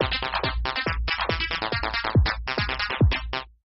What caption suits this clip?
cool stuff 2
techno, loop, fl-studio